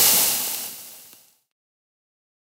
A sizzling effect made from close proximity XY (Diaphragm) recording of oil on a high heat dropped onto a heated frying pan. Careful doing this, as this spits very hot oil back at you. It however will also create this rather high timbre sound very suited to those get scalded.